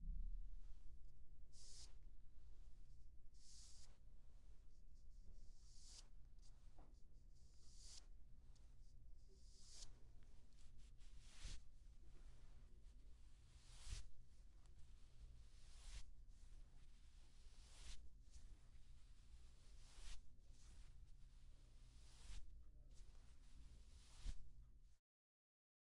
12-Slip Clothes
Clothes, Movement, Slip